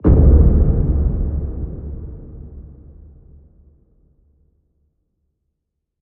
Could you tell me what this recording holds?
Cinematic Boom Impact Hit 2021
Boom, cinematic, design, film, hit, impact, tension